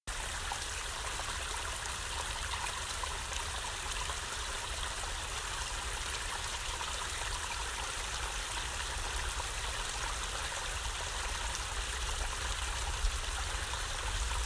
Water Flowing in Small Rocky Stream 2
Water moving quickly downhill in a small rocky stream.
stony, running, stone, rocky, mountain, lake, stream, boulder, brook, creek, water, forest, nature, river, flow